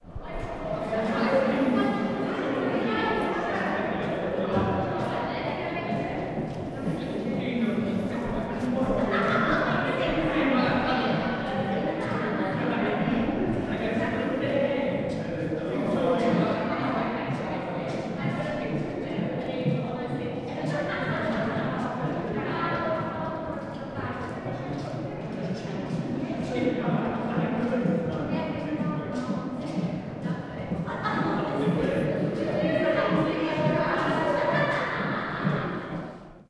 Greenwich Foot Tunnel 2
A recording made in Greenwich Foot Tunnel